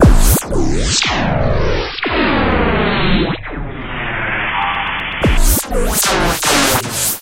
Sea sick
Panning, inertia, Bass, sick, wobbly